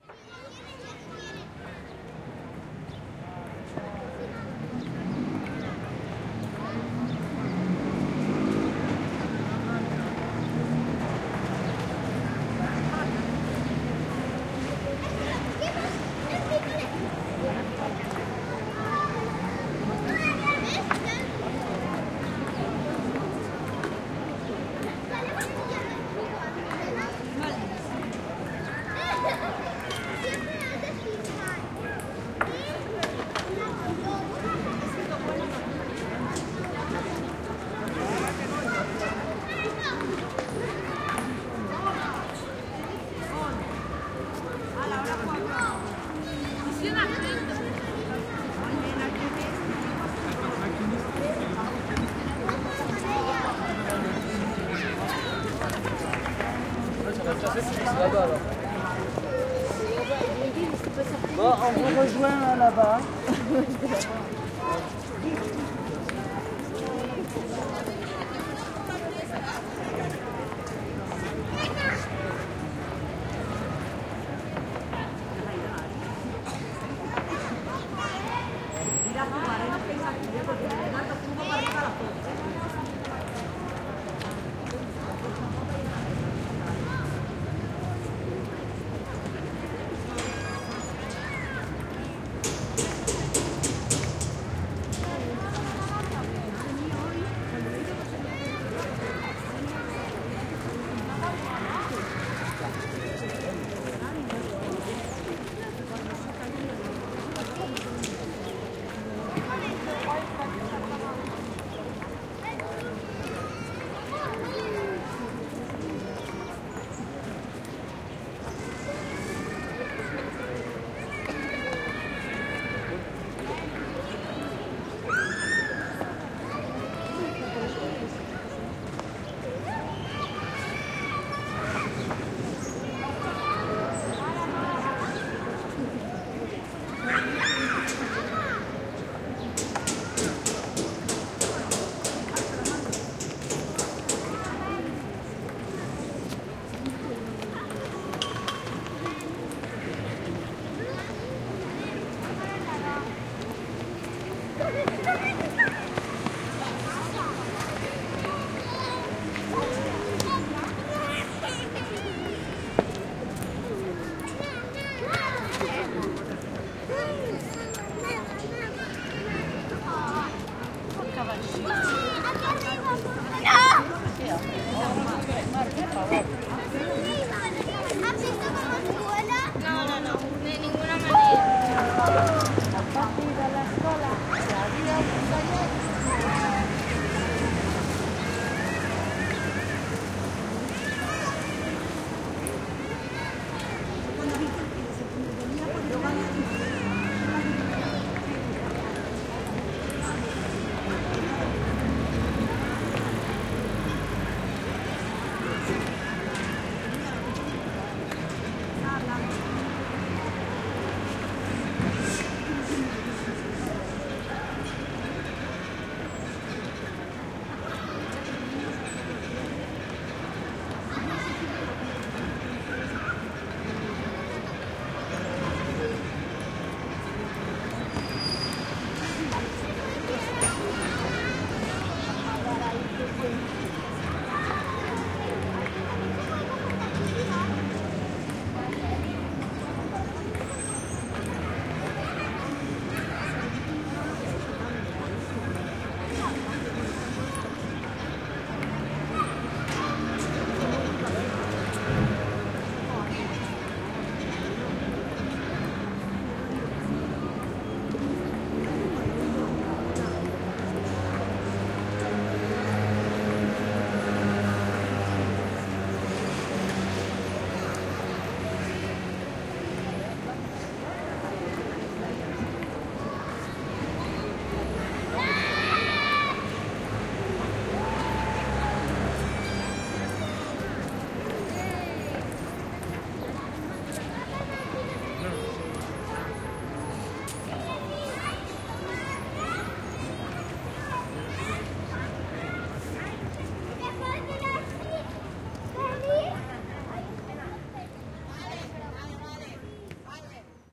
bcnt market square
Recording made on saturday evening in the middle of the Market Square. Marantz PDM-660 recorder, Audio Technica BP4029(AT835ST) mic